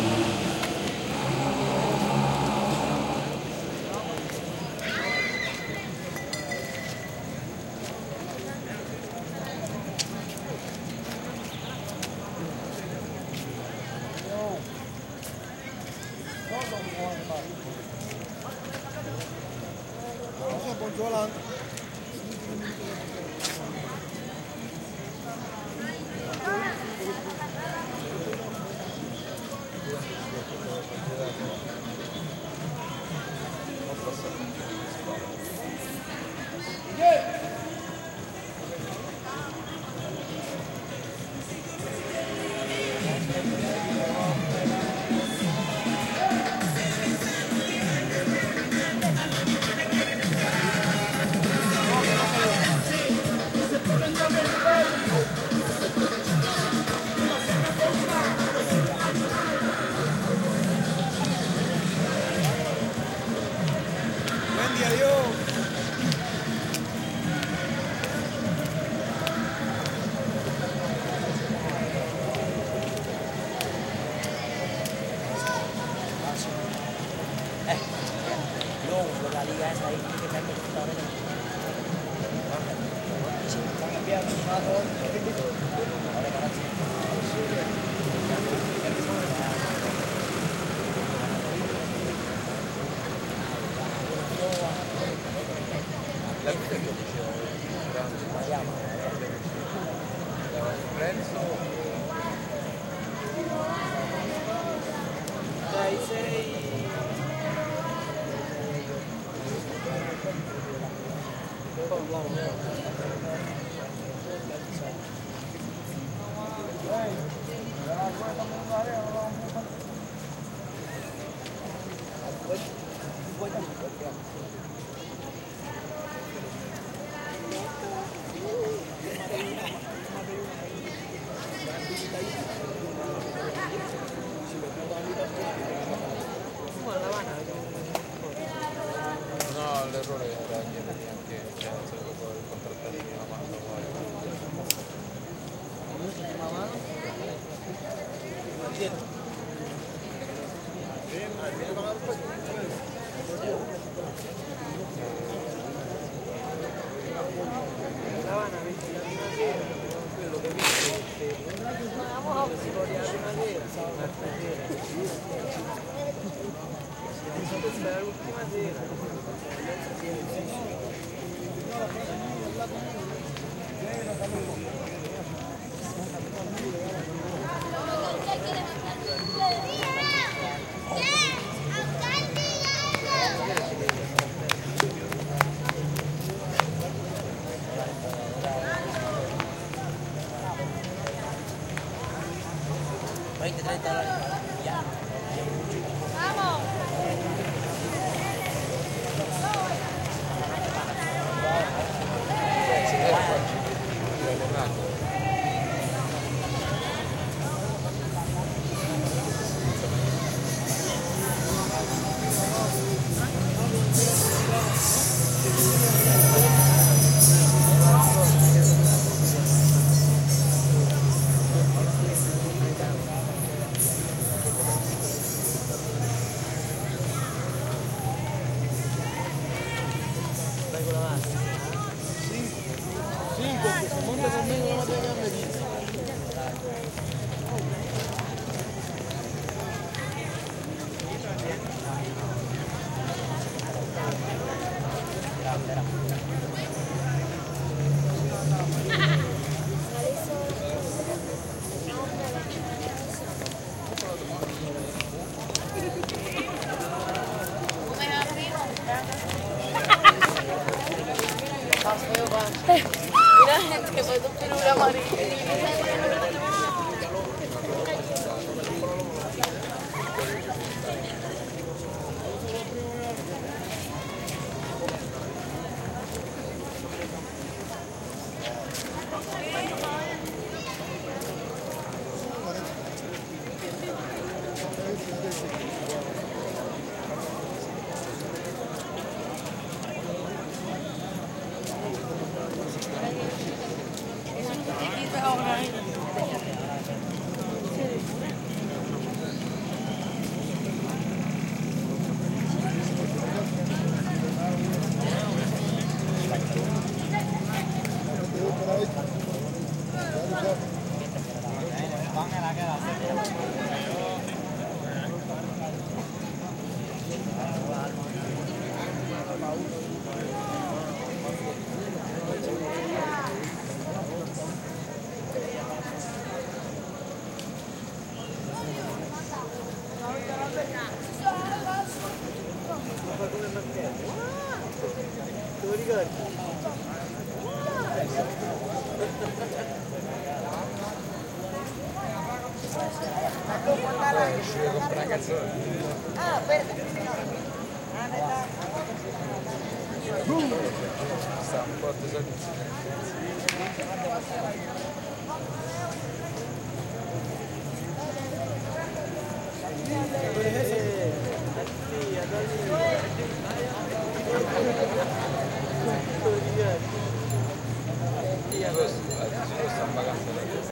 crowd cars town square cuba1
crowd cars traffic town square cuba